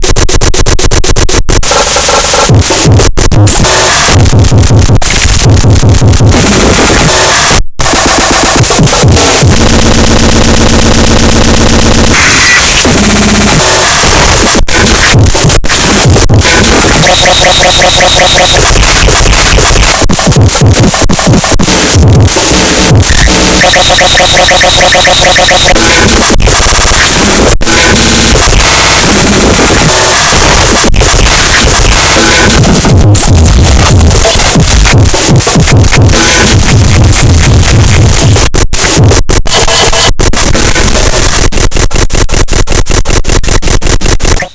a sliced up distorted hardcore drumloop